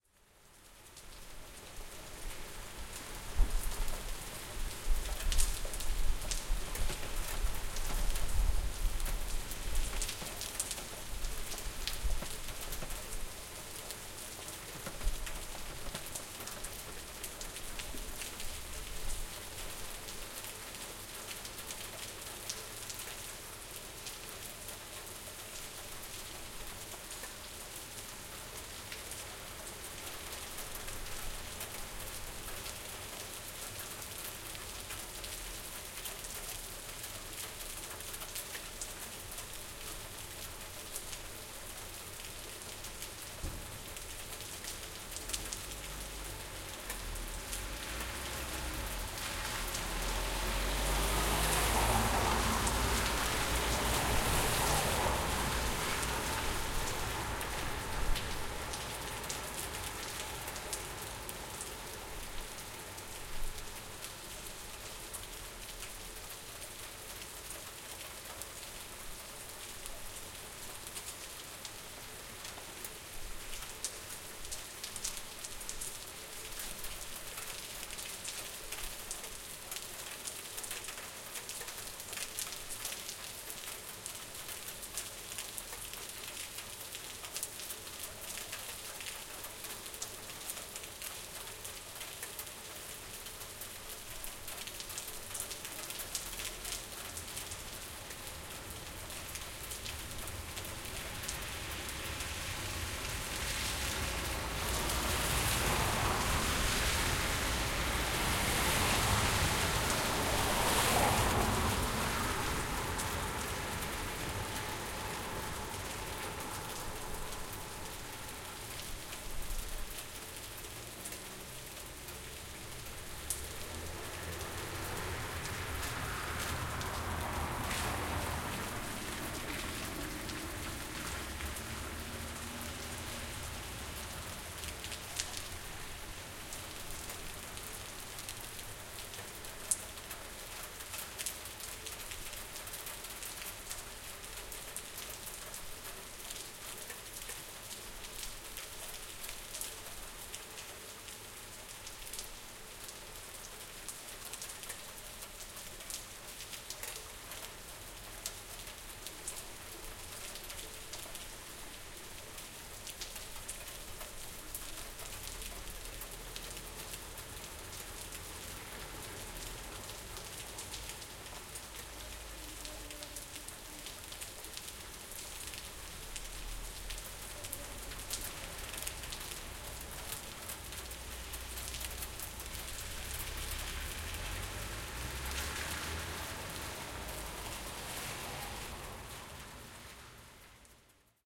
Date: 21st Feb 2013
It's late at night and it starts raining in Sevilla. It's been cloudy all day! There are some cars, vans and pedestrians around.
It may need a lo-cut filter to remove some subtle wind noises.
Es tarde por la noche y comienza a llover en Sevilla. ¡Ha estado nublado todo el día! Alrededor hay algunos coches, furgonetas y viandantes.
Puede necesitar un filtro paso alto que quite algunos sonidos sutiles de viento.
Zoom H4N, windscreen, millenium stand.